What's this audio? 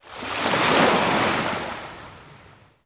One Single Wave recorded in Pangkor Island, Malaysia January 2015 with Zoom H2, edited in Audacity with fadein fadeout, kompression and normalisation